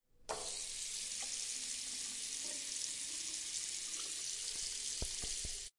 Running water tap in the bathroom sink. This sound is fresh and relaxing. It has been recorded with the Zoom Handy Recorder H2 in the restroom of the Tallers building in the Pompeu Fabra University, Barcelona. Edited with Audacity by adding a fade-in and a fade-out.
bathroom, campus-upf, sink, tallers, toilet, university, UPF-CS14, water, zoomH2handy